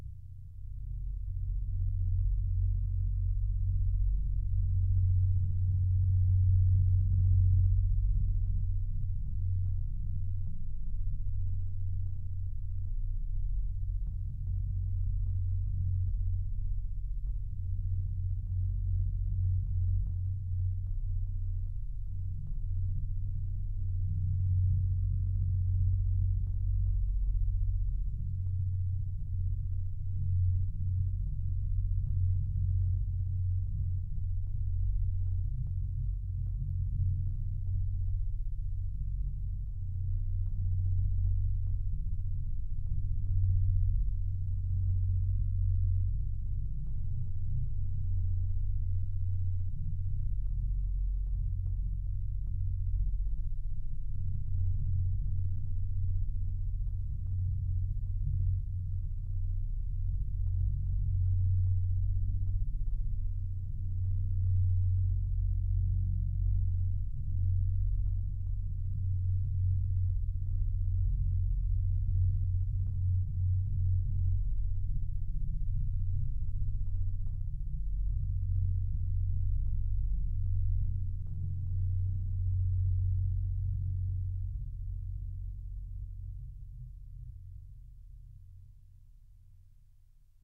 sh Star Engine 1
Developed for use as background sound/ambience for science fiction interiors. M-Audio Venom synthesizer. Low-level roar with powerful hum, changing slowly over time. Meant to evoke the sense of a starship engine room or a room full of other powerful equipment.
ambiance, futuristic, machine, M-Audio-Venom, science-fiction, synthesized